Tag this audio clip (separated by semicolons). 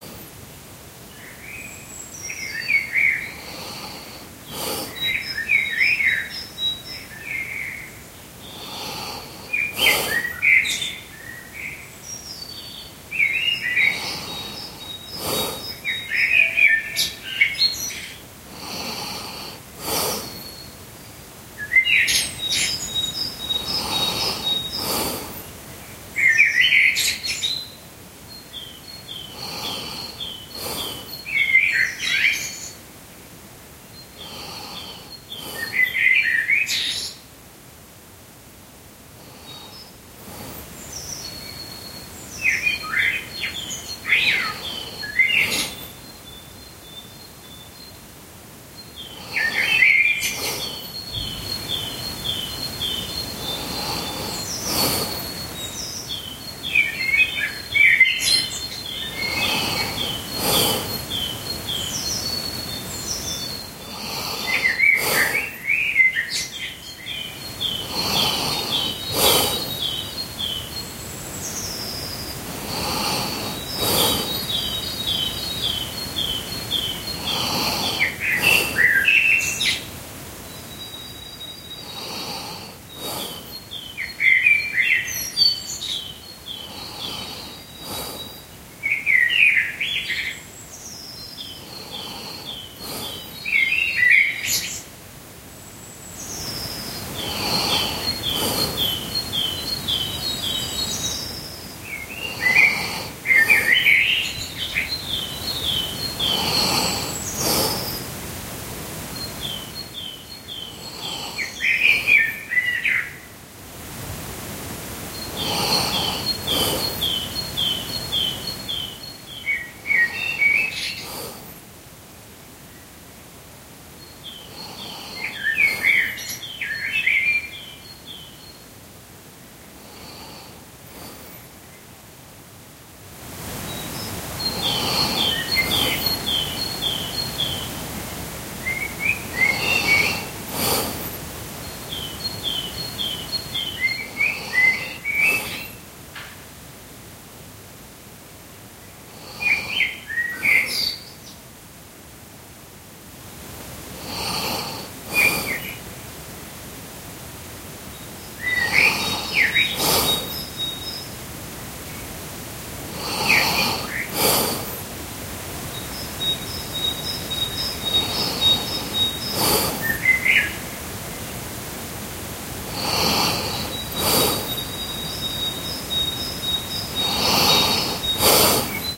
bird; morning; snore